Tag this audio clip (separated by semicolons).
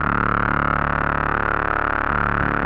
analog; mtg; studio; synthesis